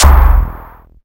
Load Drum 1
These are some really neat, long distorted kicks.
base, kick, fun, distortion